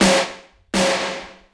Gated Snare 1 short & 1 long gate